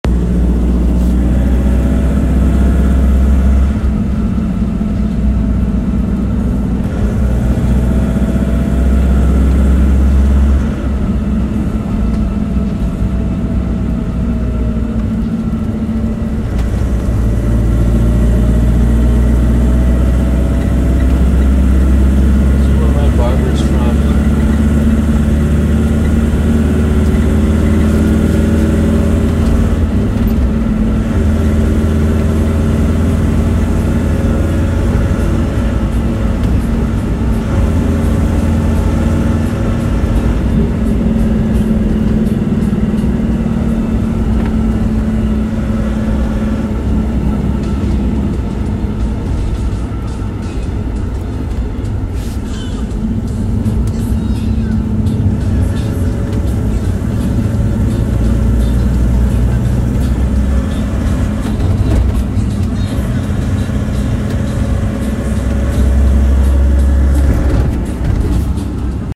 INT. TOURIST BUS
The interior of a tourist bus driving down a highway. There a few bumps in the road.